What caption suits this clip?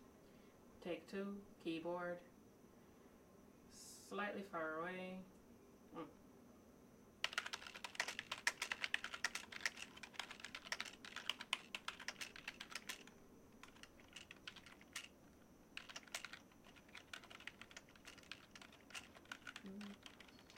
Typing on modern keyboard.
inside, typing